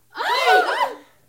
Startled 3 only women
Small crowd of only women being startled.
alarm, theatre